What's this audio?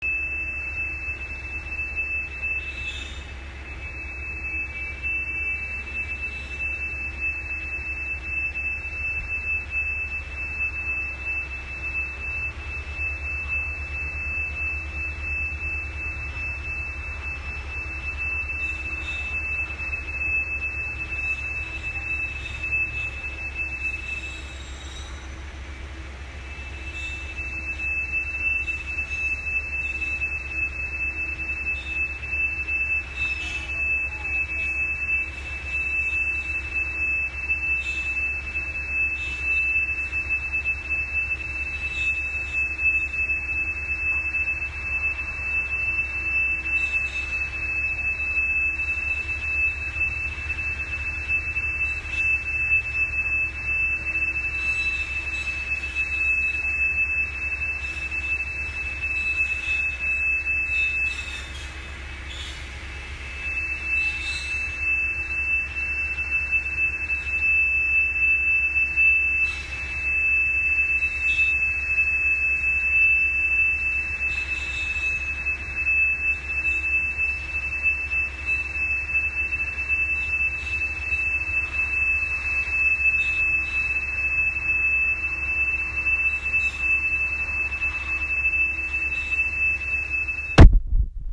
This time: The beautiful sounds of HOME office life.
The power company showed up in the front yard of one of our work-from-home employees, to trim back the trees growing near the power lines. For them to accomplish their work, it was apparently necessary that no one else in the neighborhood accomplish any work - hence they enabled this brain-jammer signal.
At least the blue jays understood our feelings (heard in background).